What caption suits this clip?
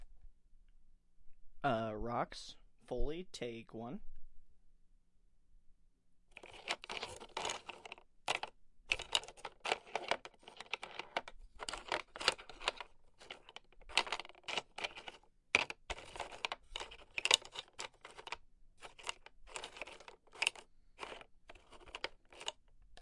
rocks moving
Fummeling with rocks foley
foley, rocks, nature